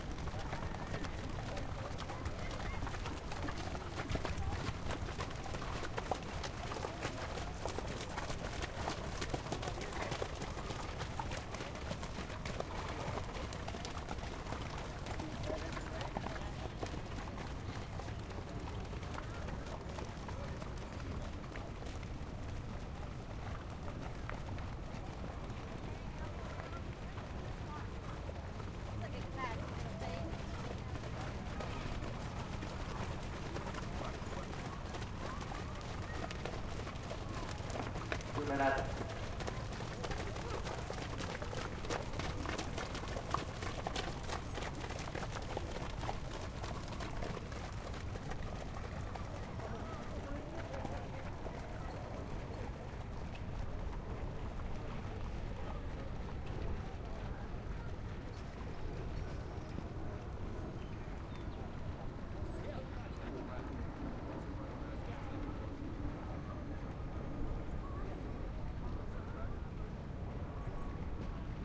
Horses walking by on sloppy track 3
This is the sound of horses walking by at Arapahoe Park in Colorado. The crowd sounds are fairly quiet. This was a later in the day so the track is a little less sloppy in this recording.
horse-racing, race, horse, horse-race, crowd, field-recording